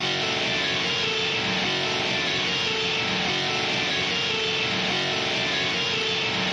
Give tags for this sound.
synth melody IDK

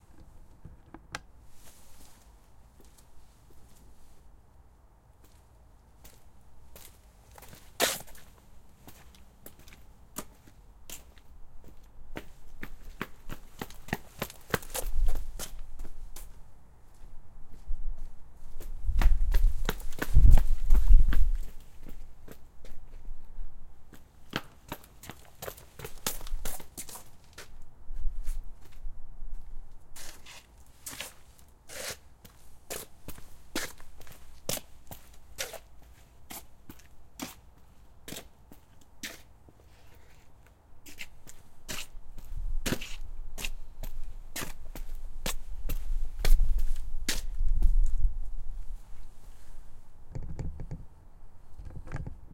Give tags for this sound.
floor footsteps Walking

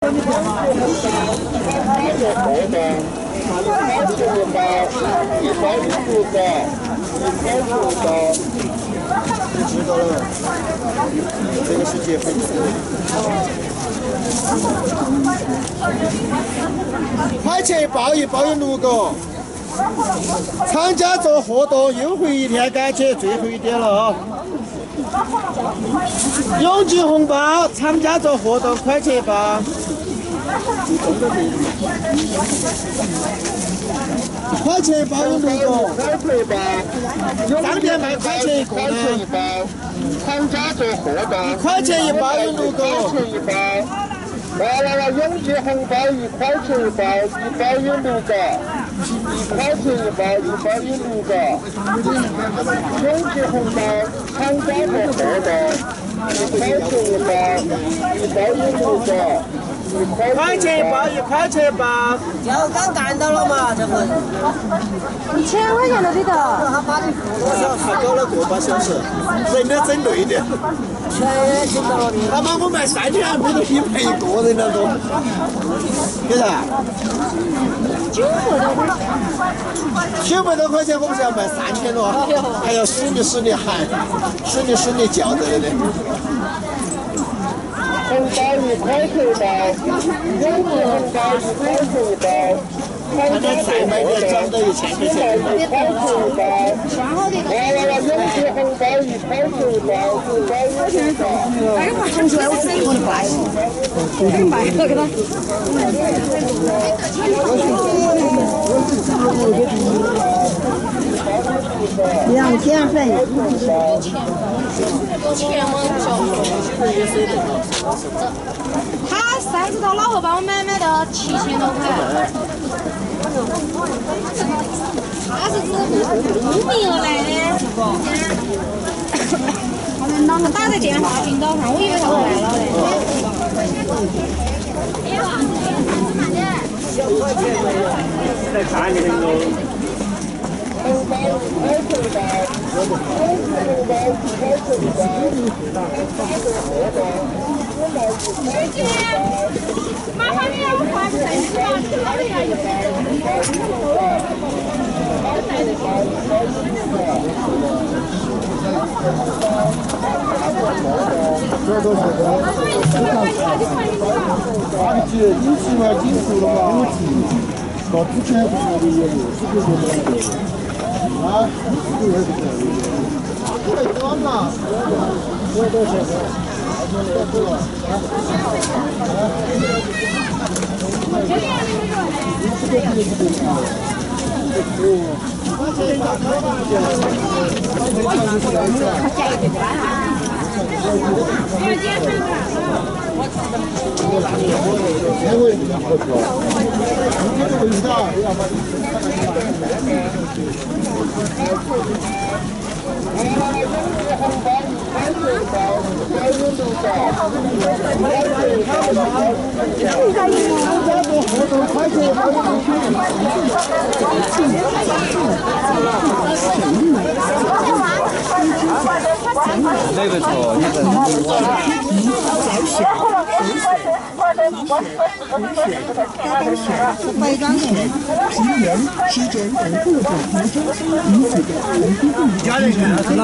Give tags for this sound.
people field-recording crowd street

